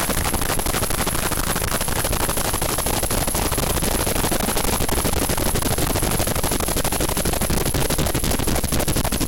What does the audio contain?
A glitch made by loading some files into audacity as VOX and GSM sounds. This one is just an "arp" glitch biscuit.
noisy, noise, computer, lo-fi, glitch, found-sound, loud